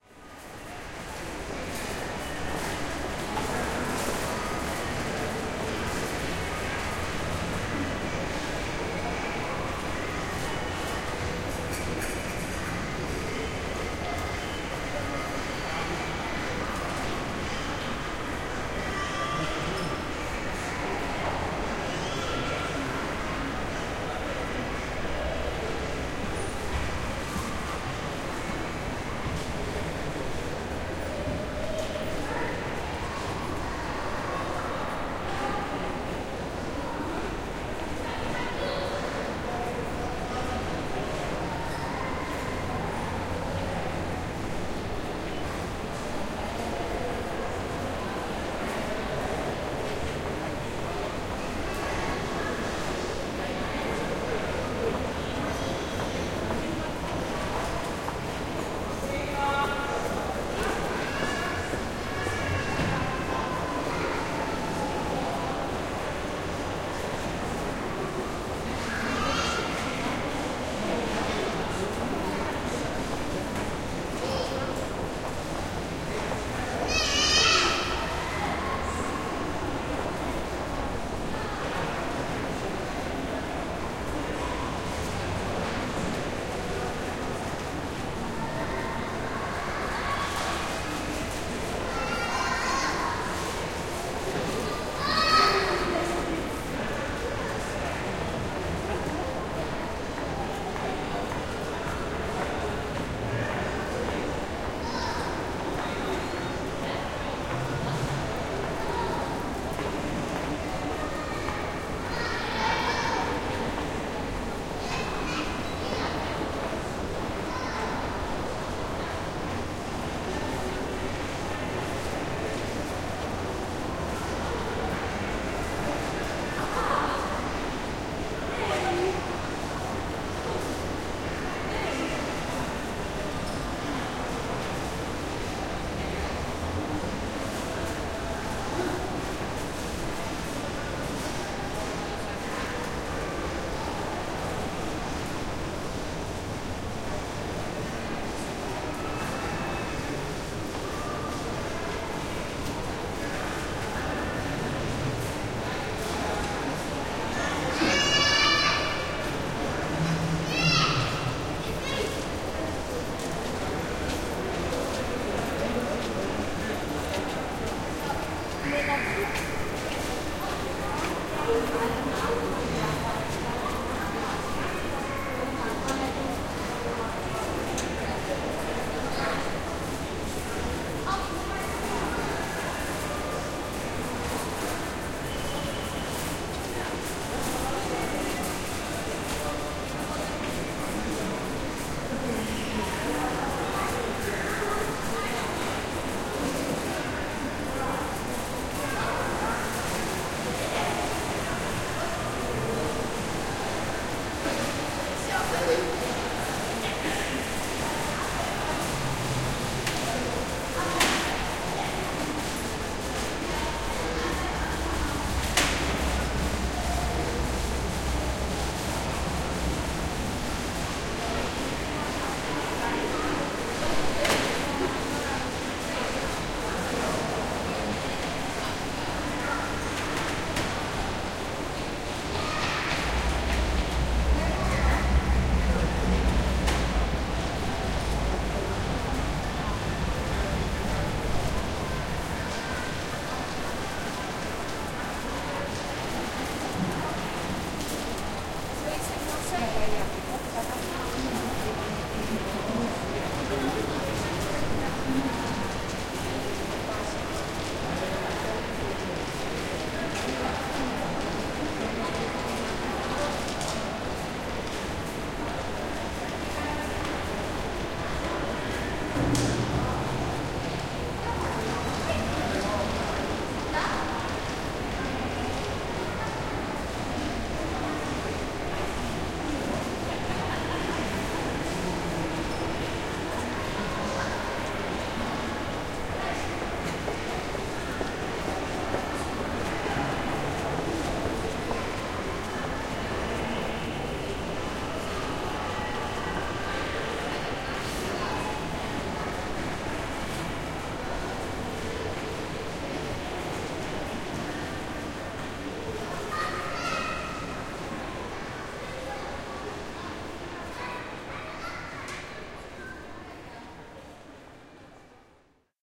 winkel centrum 1 AB
Shopping mall in recorded with DPA miniatures AB setting
amtosphere, atmo, mall, shopping